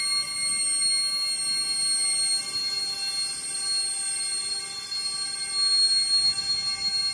The sounds in this pack were made by creating a feedback loop of vst plugins in cubase. Basically, your just hearing the sounds of the pluggins themselves with no source sound at all... The machine speaks! All samples have been carefully crossfade looped in a sample editor. Just loop the entire sample in your sampler plug and you should be good to
go. Most of the samples in this pack lean towards more pad and drone like sounds. Enjoy!
ambient, atmosphere, drone, electronic, generative, loop, pad, processed